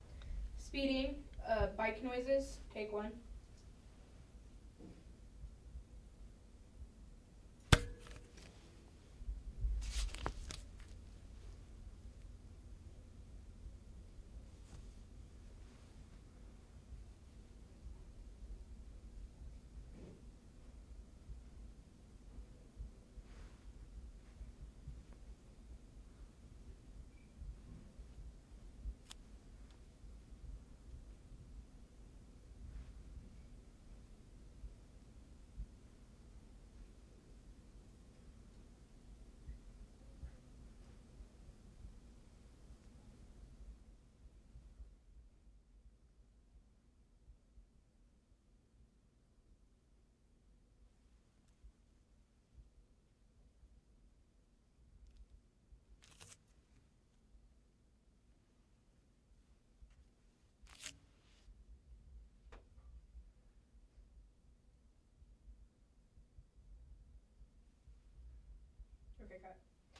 Misc. Pedal and bike clicking noise